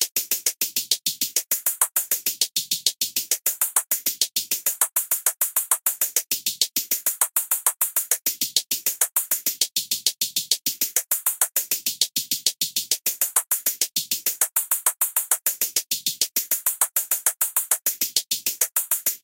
Ringshift Hi-Hat Loop
A hi-hat line of 16th notes at 100 bpm. There's a slightly different sound that plays every third note hit. It's put through a heavy phaser and ringshift filter.
ringshift, change, flange, drum, hihat, 100-bpm, hi, loop, filter, hi-hat, beat, triplet, rhythm, reverb, delay, phase, drum-machine, percussion